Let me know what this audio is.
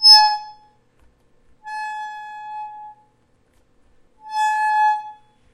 Rotating a metallic chair